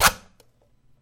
aluminum can sliced with a knife
aluminum, can